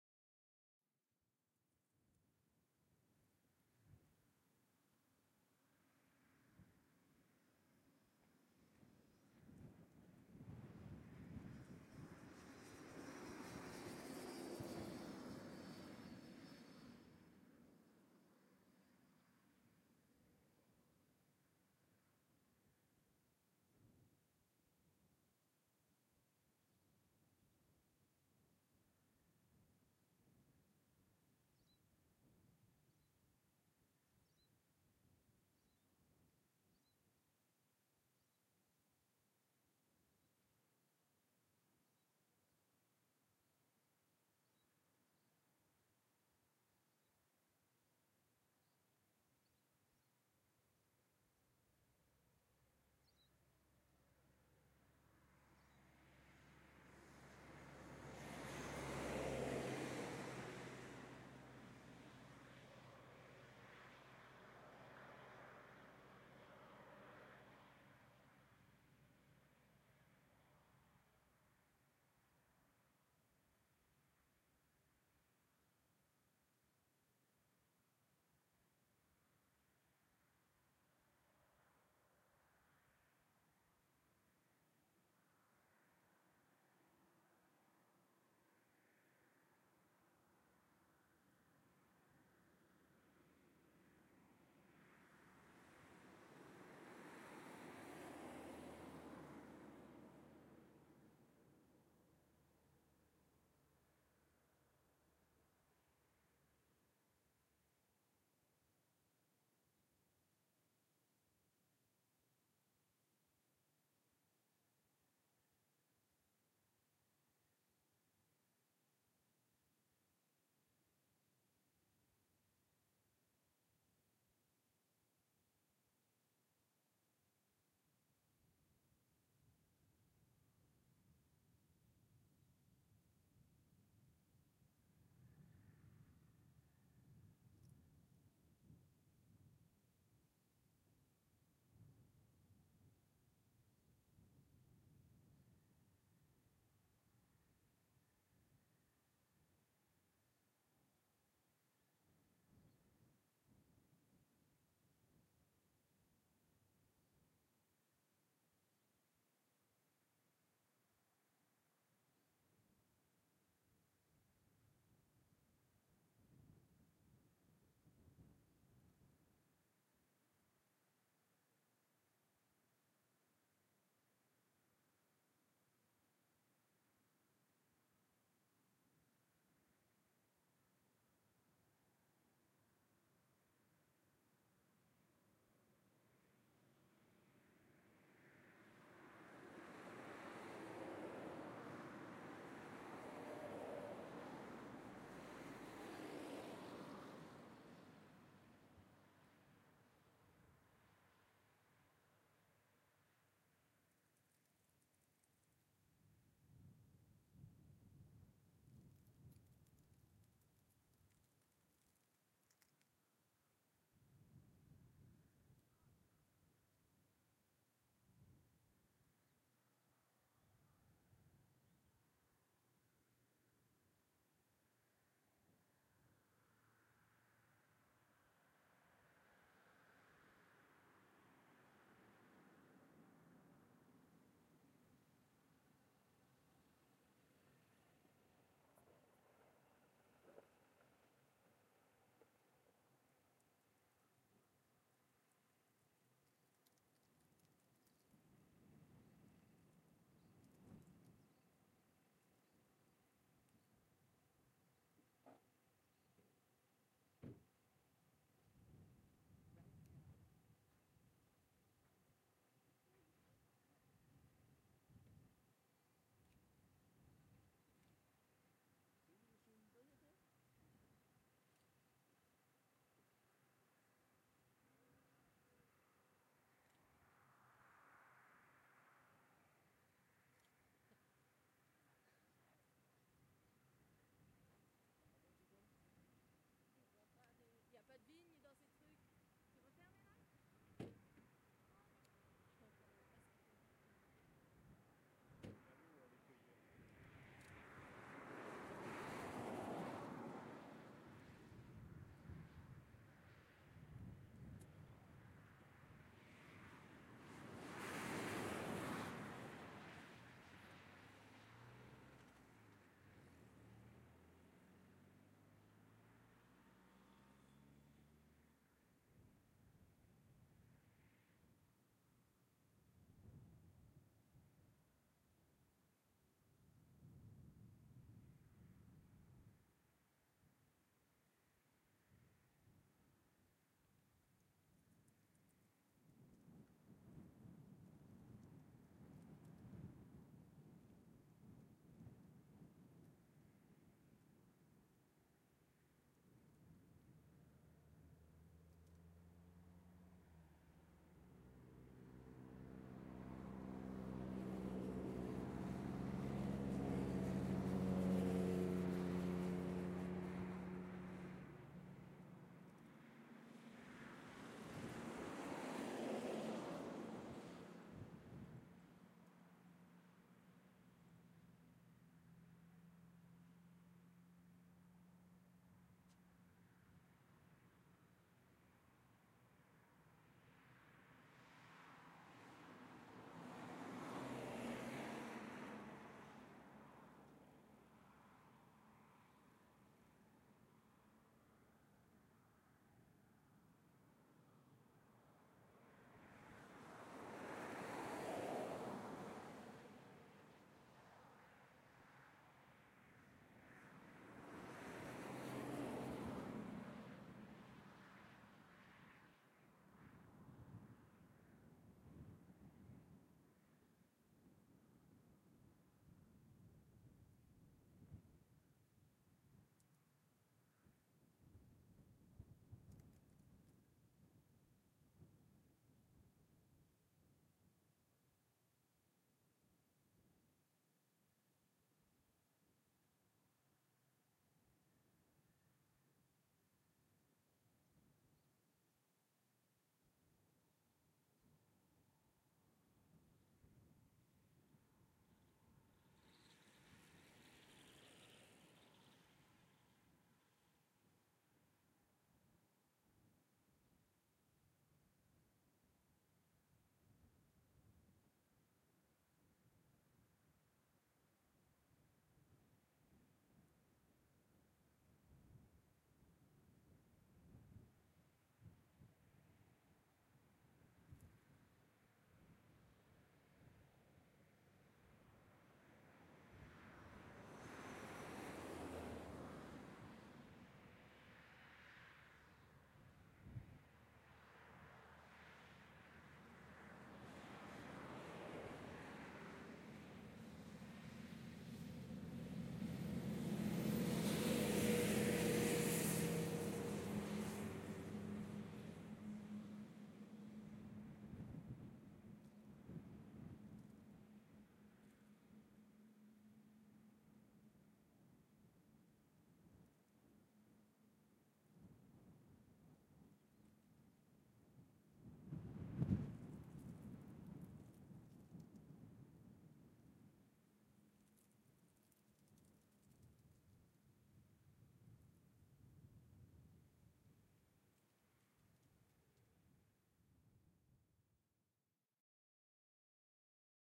Quiet Road Lanzarote LZ30 Light Wind 2
The second of two early morning recordings on an unusually still day on Lanzarote's LZ-30 highway, which runs through a valley of vineyards. Very quiet ambience. Sparse single vehicles (mainly cars and trucks) passing on a slight incline, so the trucks can be heard labouring slightly. Recorded around 12m from the side of the road (opposite side to this file's sister). Light intermittent wind. Occasional very distant birdsong and agricultural machinery. Passing cyclist freewheeling downhill clearly audible toward end of recording. Zoom H4n recorder.
ambience, cars, country, cycling, field-recording, passing, peaceful, Road, traffic